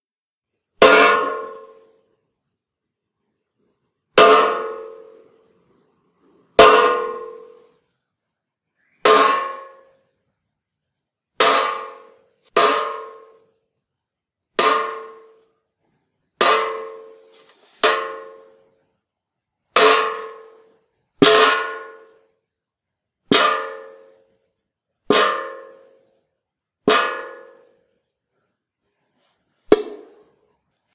Several taps on a metalic bin used for a video game.